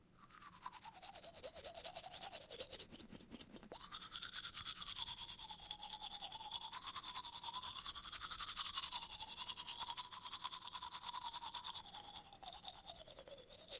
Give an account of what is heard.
Brushing teeth
Tooth brushing. Recorded with a Zoom H5 and a XYH-5 stereo mic.
brushing, cleaning, hygiene, tooth, toothbrush